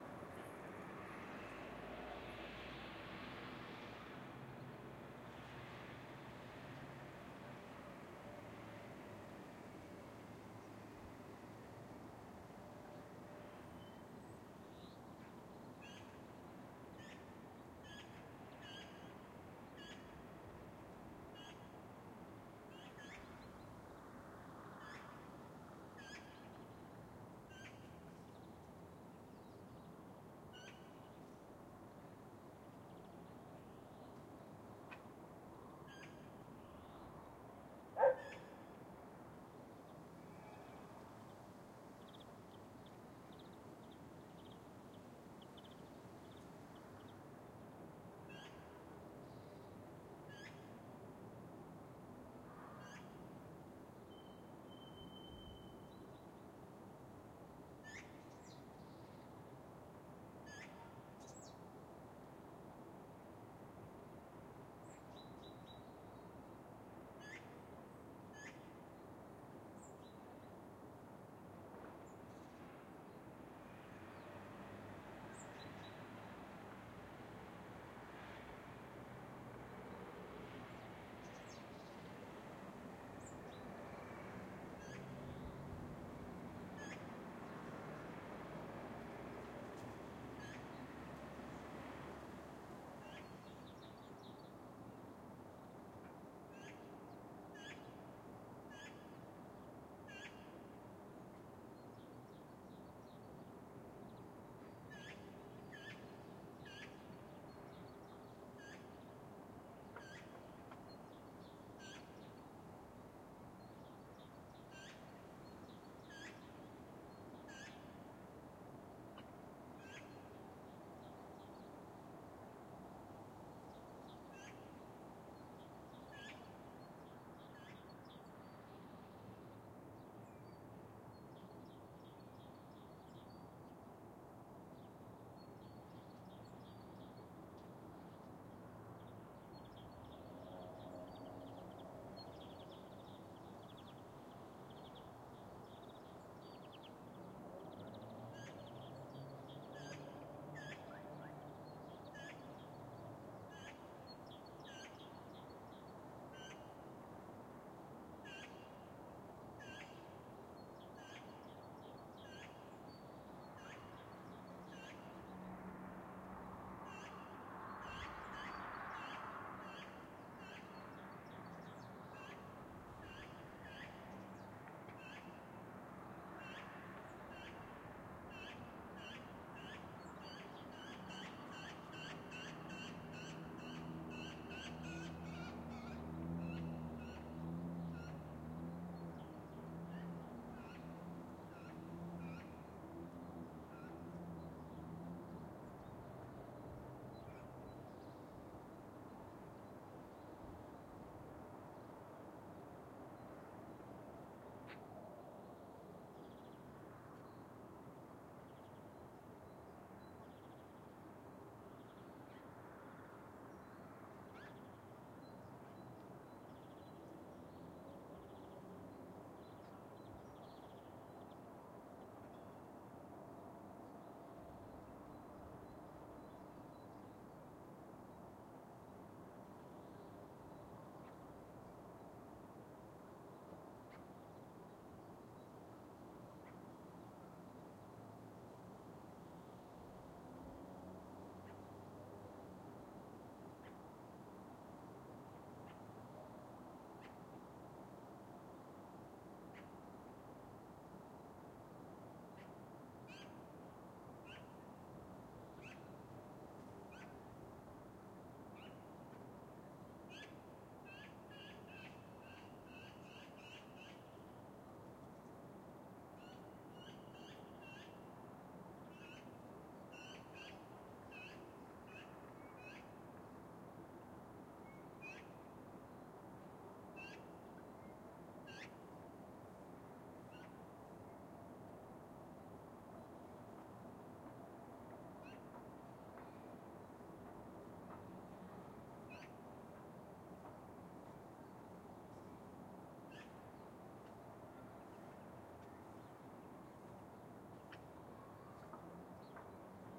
Suburb Soundscape
birds, cars, Chicks, magpies, outside, Soundscape, street, suburban